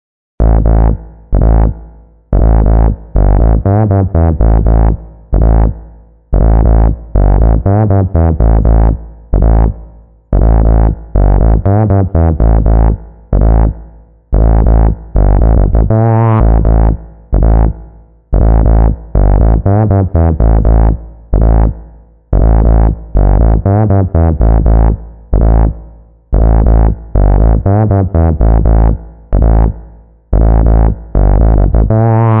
Zebra Ms20 filter very analog
Zebra can sound very analog.
Uhe, filter, ms20, zebra